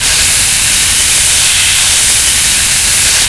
a bit of noise heavily processed with Adobe Audition
electronic, glitch, industrial, loop, noise, processed, sound-design, sustained